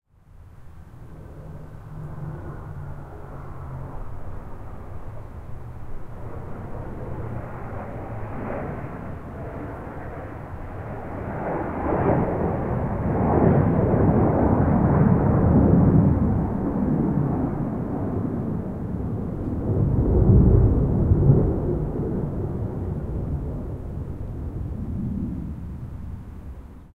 F/A-18 Hornets practicing maneuvers in Seattle Washington.